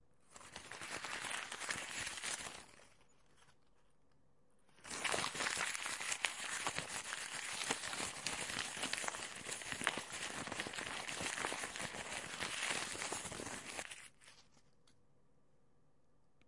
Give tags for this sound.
paper
papel
short

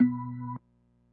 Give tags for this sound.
b3
note

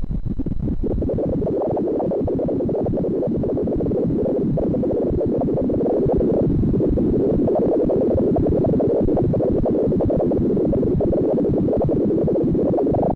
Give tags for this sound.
bubbles
water
fizzy
bubbling
bubbly
under-water
bubble
hydrophone
turbulence
underwater

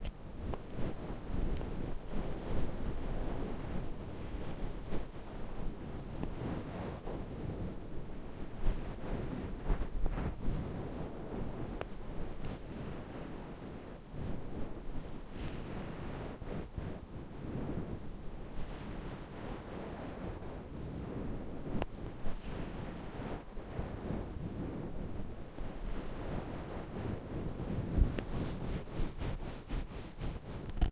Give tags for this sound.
asmr
fuzzy
wind
soft
air

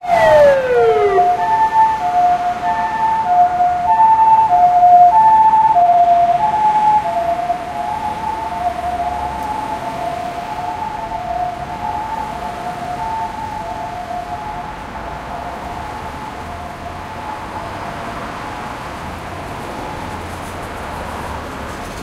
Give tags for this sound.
alarm
field-recording
town
sirens

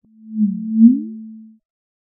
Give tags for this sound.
cast
casting
dark
energy
evil
Force-field
magic
spell
unearthly

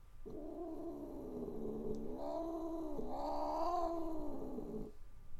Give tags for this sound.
anger
howl
growl
fury
cat
pet
noise

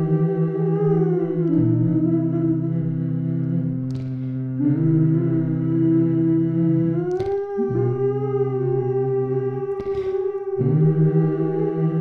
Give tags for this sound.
loop
vocal
voice

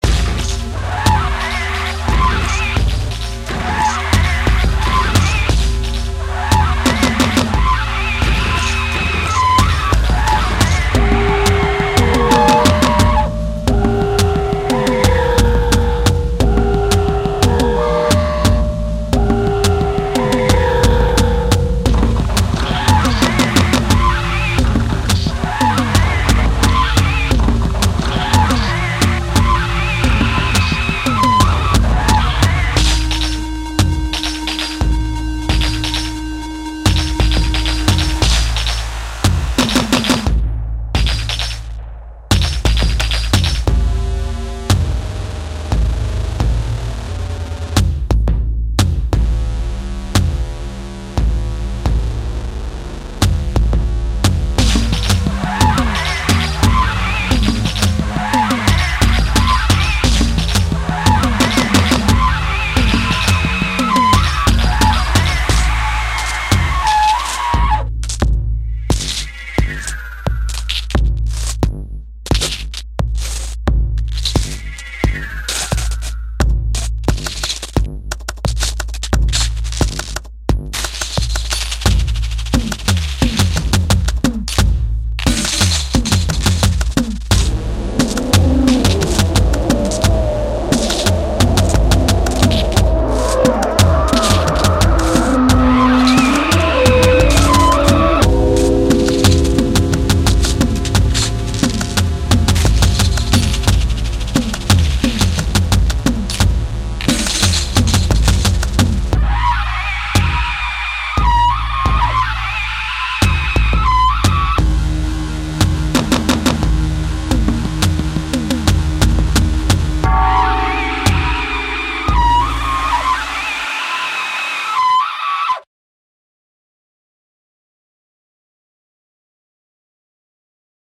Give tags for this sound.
beat
rap
death-metal
beats
no-shame
cry
screamo
production
industrial
elephant
scream
synth-punk
uncomfortable
beatz
hxc
sick

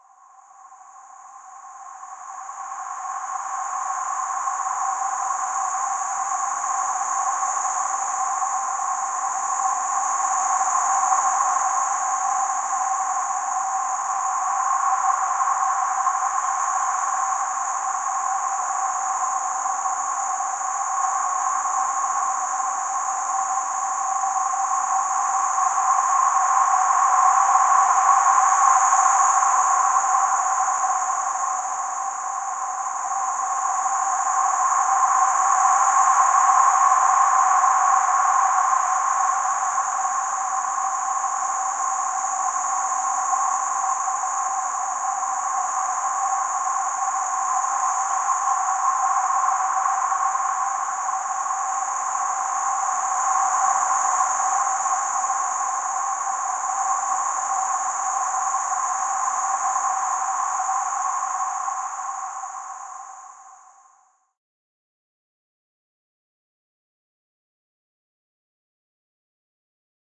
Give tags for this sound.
ambient
eerie
horror
noise

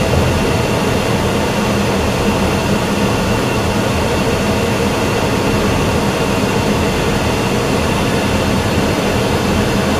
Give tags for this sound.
wind
war
jet
technology
plane

